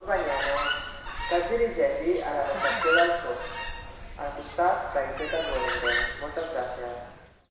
Chajá & megafonía
Call of a Southern screamer (Chajá, scientific name: Chauna torquata) and amplified announce through the loudspeakers of the zoo.
animals, voice, field-recording